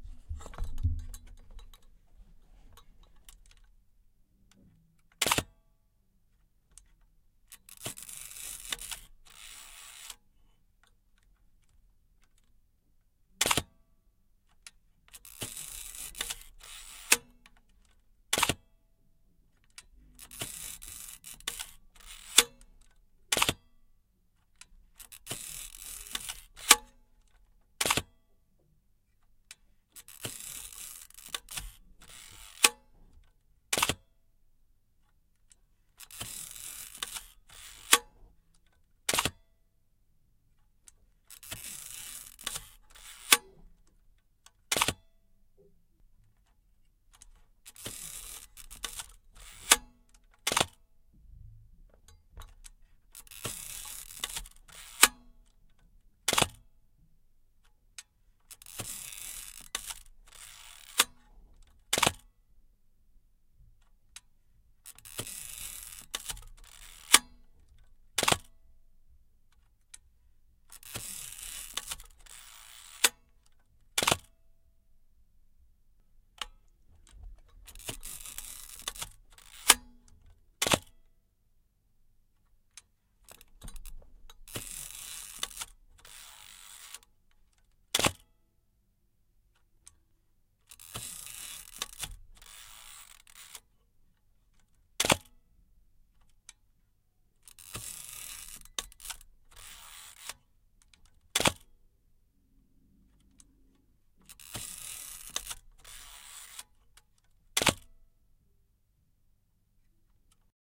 A Medium format camera. Shutter relase at a 15th 30th and 60th of a second.